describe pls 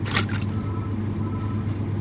Cucumber splash
Cucumber dropped in brine in a supermarket.
From old recordings I made for a project, atleast ten years old. Can't remember the microphone used but I think it was some stereo model by Audio Technica, recorded onto DAT-tape.
dropped, splash, cucumber